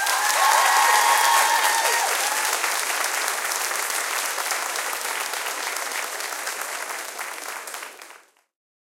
Small audience clapping during amateur production.